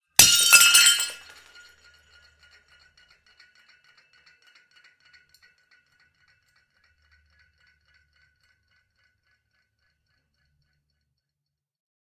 The unmistakable sound of a plate breaking on concrete